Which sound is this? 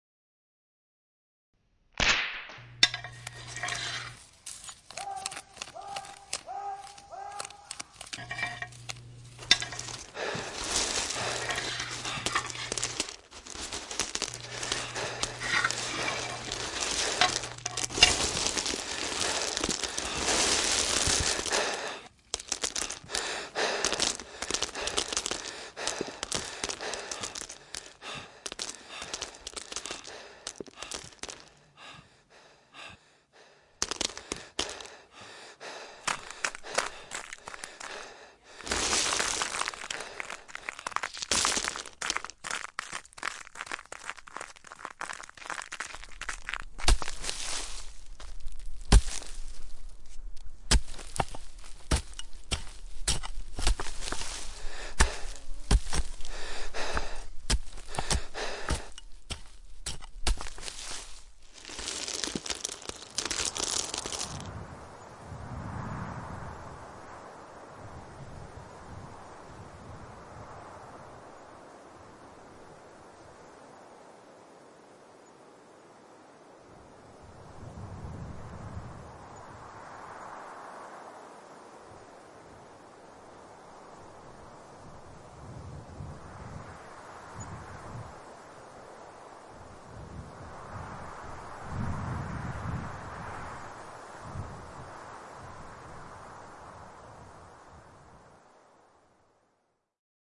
Tomb Escape (breath and wind) 1.1
Escaping a tomb - cracking through a stone wall and manoeuvring through a narrow tunnel, scraping gravel and earth out of the way. An alarm cry is raised at the first stone crack; the Tomb Escapers breathe and pant at the strenuous activity. As they leave the tunnel, they hear wind. Can be used as a whole scene or broken into smaller clips.
“During the "patagonian rodeo"...”